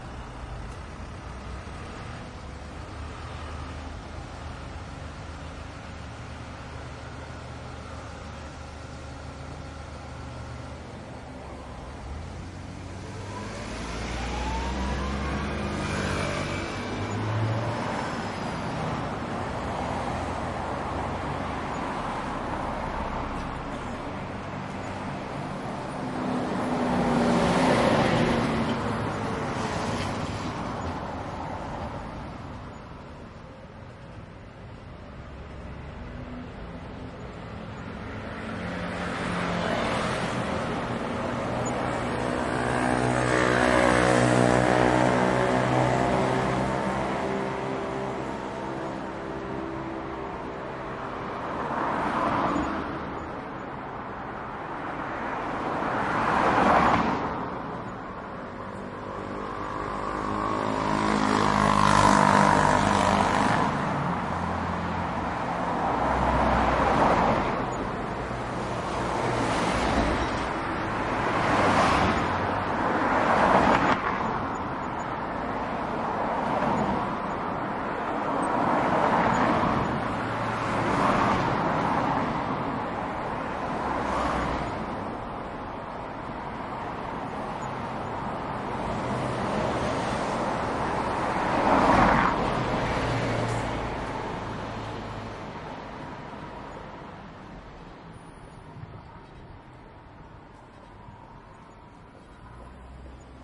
Japan Tokyo Uchibori-Dori Crossing Traffic Cars Trucks
One of the many field-recordings I made in Tokyo. October 2016. Most were made during evening or night time. Please browse this pack to listen to more recordings.
ambiance, ambience, ambient, atmosphere, cars, city, city-noise, evening, exploring, field-recording, heavy-traffic, Japan, japanese, Tokyo, tourism, traffic, truck, trucks, urban